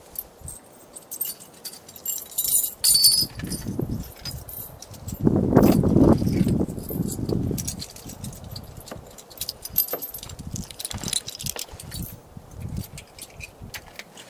Shuffling Glass 1
Includes some background noise of wind. Recorded with a black Sony IC voice recorder.
breaking, broken, glass, pieces, shards, shuffle, shuffling, smash, tinkling